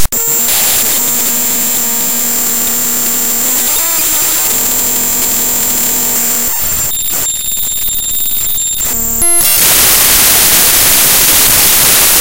Raw import of a non-audio binary file made with Audacity in Ubuntu Studio
electronic, random, harsh, binary, computer, file, extreme, raw, glitch, glitchy, noise, distortion, data, glitches, loud, digital